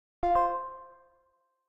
Confirm Button
videogame, confirm, button, checked, buttonsound, accept